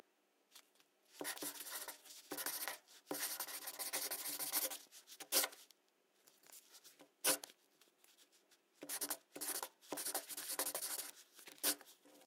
writing pencil
writing with a pencil in a fast way. Recorded with Rode NT1000